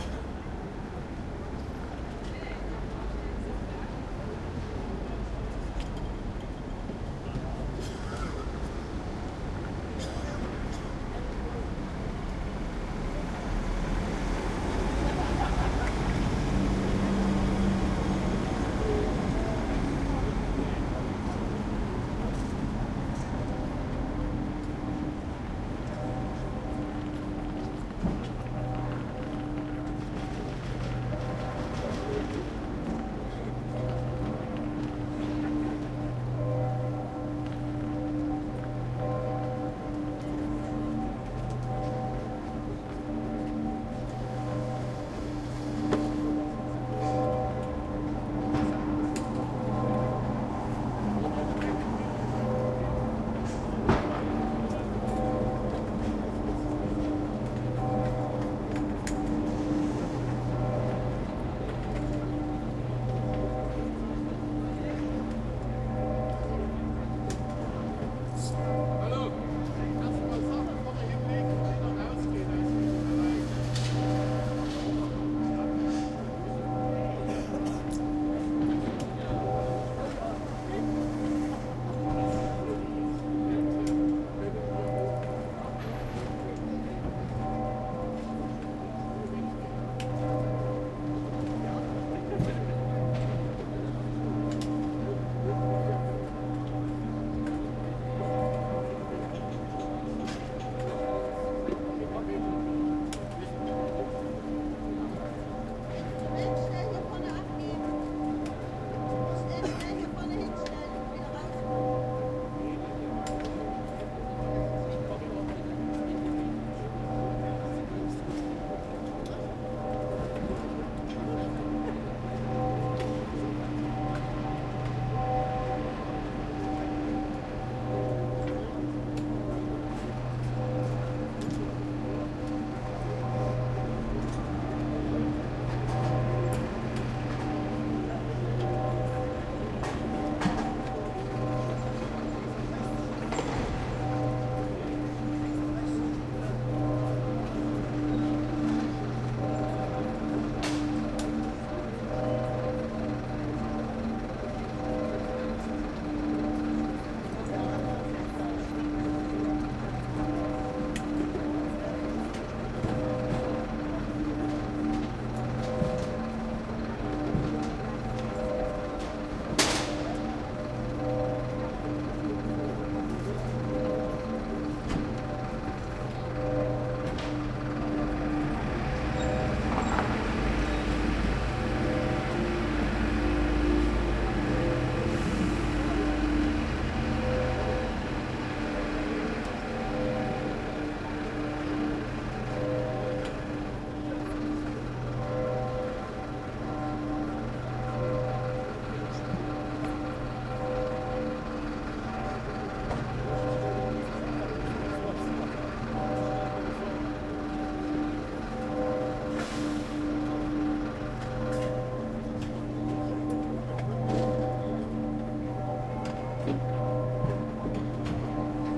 120909-0901-FG-TdS-Obermarkt
These recordings were made at the annual "Tag der Sachsen" (Saxony Fair) in Freiberg. Recordings were done on the main market square (Obermarkt), where a local radio station had set up a large stage for concerts and other events.
Recording was done with a Zoom H2, mics at 90° dispersion.
This is later in the morning after the event, at about 9.00, stage work and cleaning have been largely completed, trucks are driving around, church bells are ringing, and stage hands are busy preparing the area for a large open-air mass to conclude the festival.